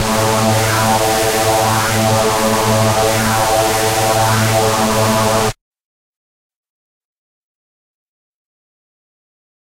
multisampled Reese made with Massive+Cyanphase Vdist+various other stuff